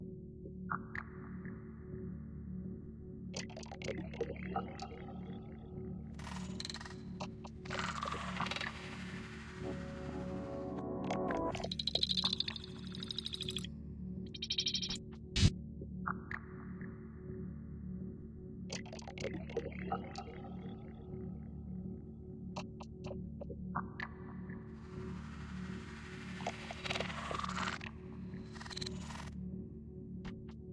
A 30 seconds loop i made using FL Studio 10 and Samples